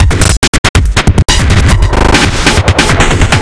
"glitch loop processed with plugins"